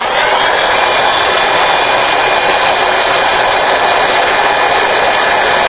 Vacuum Power
This is a small dirt devil vacuum set to high.